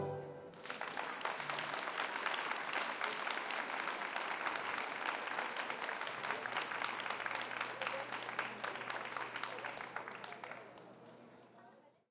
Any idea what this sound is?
090812 - Rijeka - Pavlinski Trg - Quartet Veljak 4
Applause during concert of Quartet Veljak in Pavlinski Trg, Rijeka.
ambience, aplause, applaud, applause, audience, hand-clapping